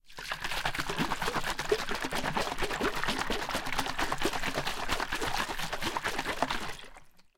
Shaking Waterbottle
An 800 ml plastic water bottle with a full top (like a Nalgene) being shaken vigorously with approximately 500 ml of water inside. The sound is fairly high frequency most of the time with several lower sloshing sounds. Recording done by shaking the bottle over a Sony PCM-D50 recorder.
aip09, bottle, plastic, shake, shaking, water, water-bottle